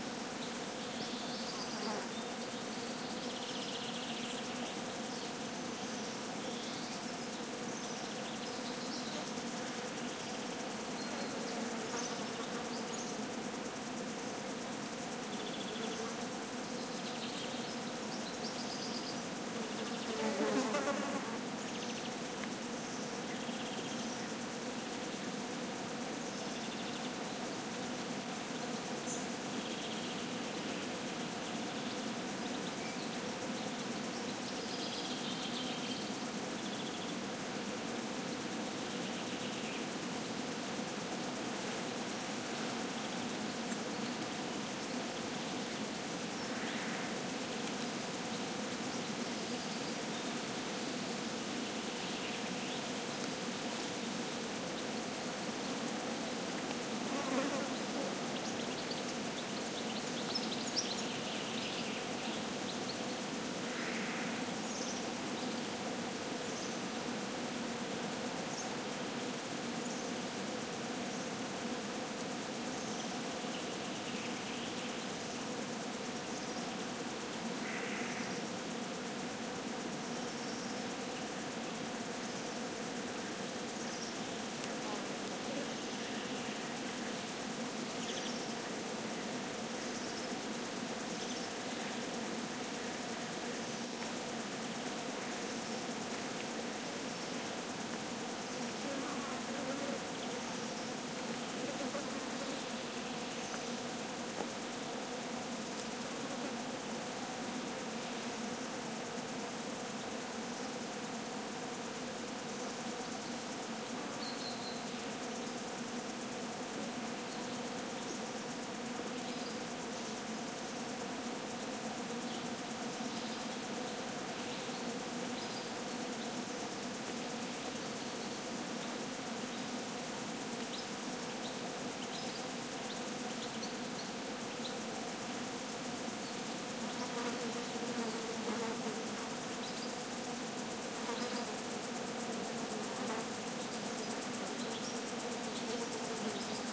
Spring ambiance in Mediterranean forest, with bees buzzing and a few bird callings. Recorded at the Ribetehilos site, Doñana National Park (Andalucia, S Spain)